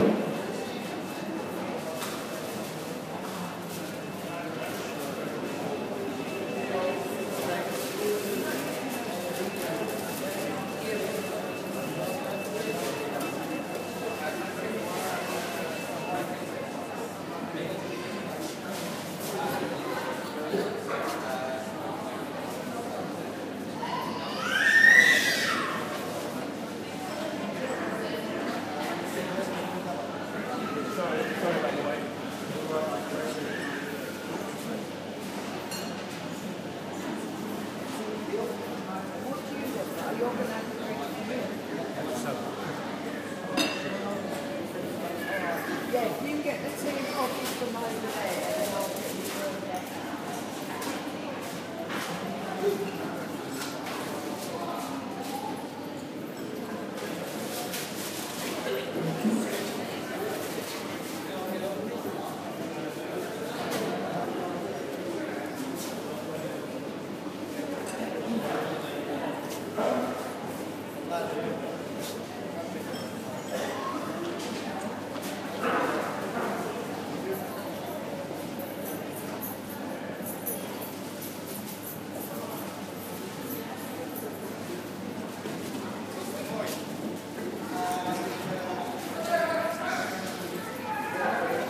a busy cafe